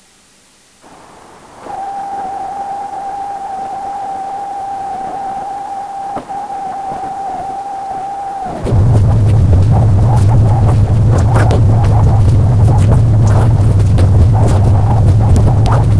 sample exwe 0081 tr
generated by char-rnn (original karpathy), random samples during all training phases for datasets drinksonus, exwe, arglaaa
char-rnn, generative, network, neural, recurrent